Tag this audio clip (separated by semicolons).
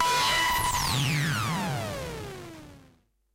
cowbell; future-retro-xs; metasonix-f1; symetrix-501; tr-8; tube